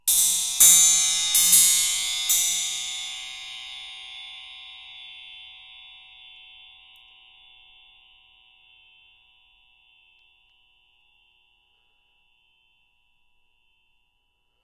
Clang group short 1
A metal spring hit with a metal rod, recorded in xy with rode nt-5s on Marantz 661. Hit repeatedly
Metal-spring, discordant, untuned-percussion